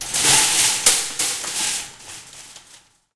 A grocery store shopping cart crashing into another outside. Less background noise. Marantz PMD671, Rode NT4, 2005.
shoppingcart, shopping